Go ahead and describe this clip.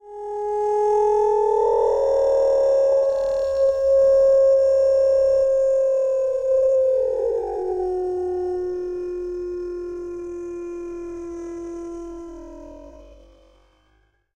Another time-stretched version of the Expressive Moan in my Sled Dogs in Colorado sound pack. Recorded on a Zoom H2 and processed in Peak Pro 7.